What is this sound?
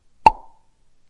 PLEASE READ FULL DESCRIPTION
Made with finger and mouth
using a Tascam DR-07 MKII
I would interest me and satisfy my curiosity as I'm a curious sort of person.
if you feel my sound/s is/are quite significant for a significant project, it would also be nice if you gave credit and a link if it's practical to do so.